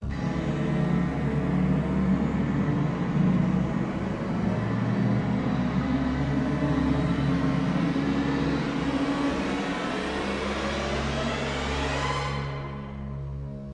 Orchestra Strings Glissando
A pitch progression in note C Orchestral strings to an octane higher. Most notably used by Hans Zimmer in The Dark Knight for the Joker scenes.
dark, glissandro, has, joker, knight, note, orchestra, pitch, strings, vibrato, zimmer